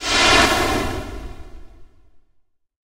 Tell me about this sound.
steam puff exhaust
steam, puff, exhaust